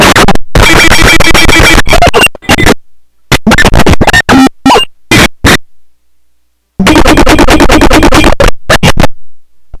Is This All This Does
experimental, glitch, rythmic-distortion, bending, core, circuit-bent, coleco